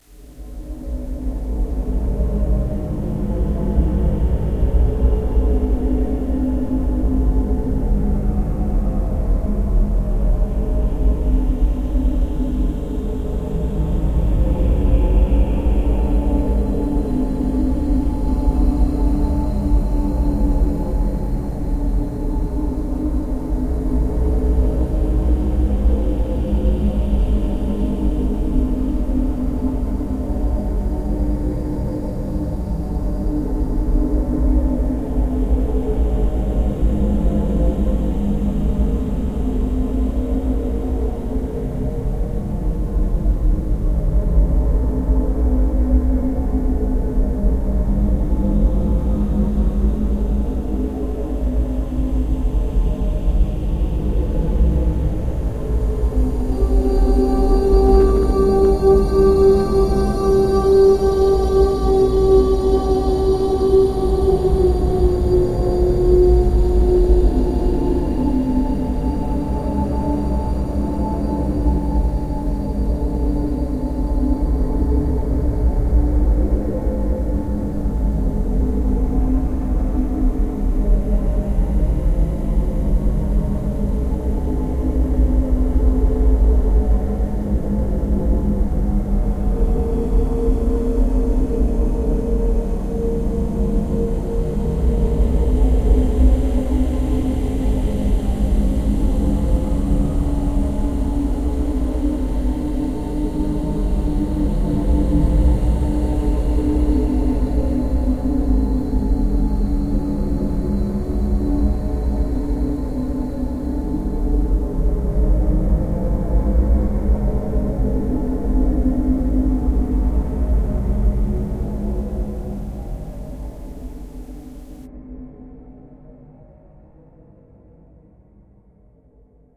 Shadow Maker-Garden
Now take a look out of a window and see the old and haunted garden.
What you hear is the sound of an old mystic Engine, or something else, i don´t know. it haunts this old house for almost ten years. Will you discover the truth of tze old Stairs leading up on the next floor? I made it with Audacity. Use it if you want, you don´t have to ask me to. But i would be nice if you tell me, That you used it in something
Horror, Creature, Maker, Film, Free, Sound, Creepy, Dark, Public, Cinematic, Drone, Shadow, Halloween, Evil, Cellar, Movie, Nightmare, Passing, Ambiance, Atmosphere, Engine, Entrance, Scary, Hall, Ambient, Light, Spooky, Ghost, Ambience, Fantasy